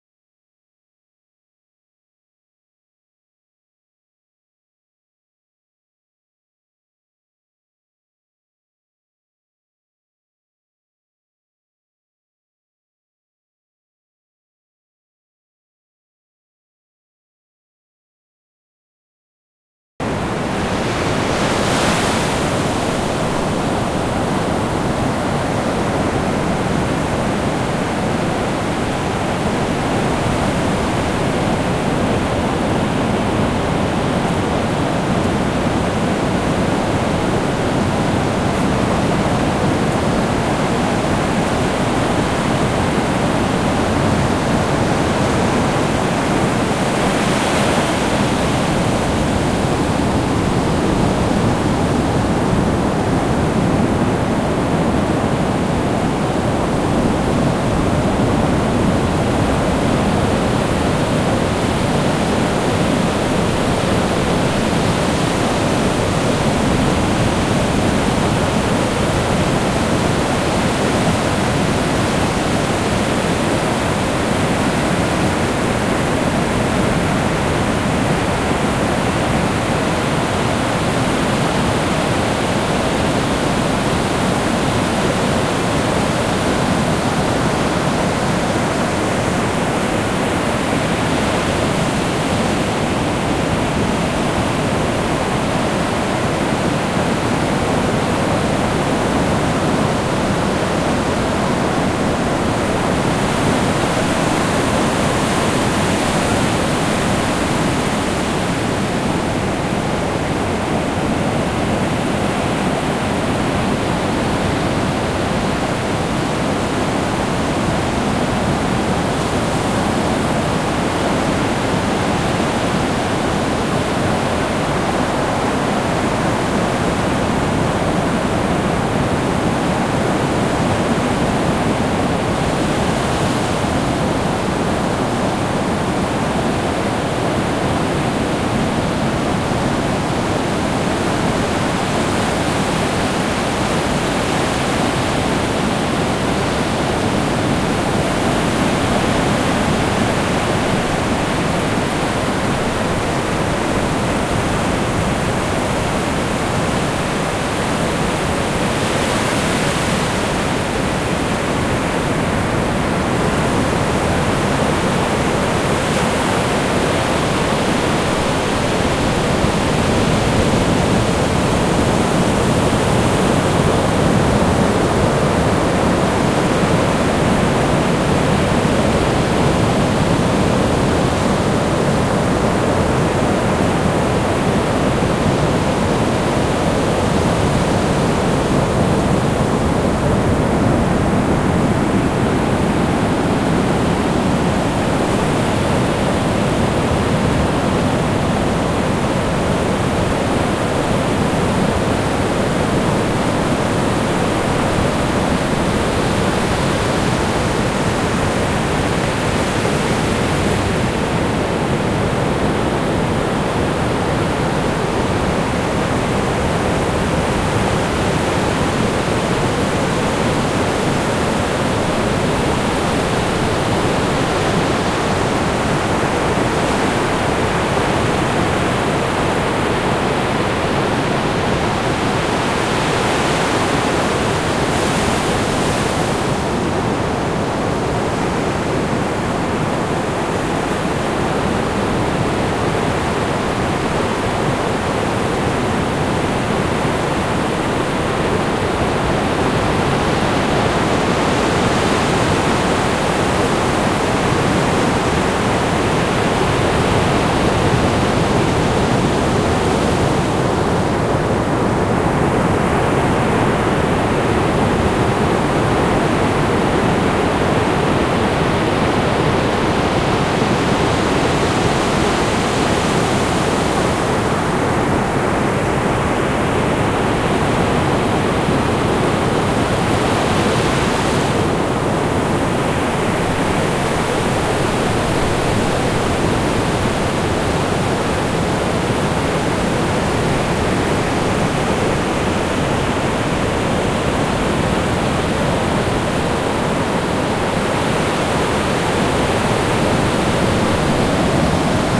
Sound of mediterranean sea in the beach of Gandía in an afternoon of a day of November with calm weather. You can hear the waves and the wind with some sounds of steps of people walking in the shore.
Gand, beach, shore, a, mediterranean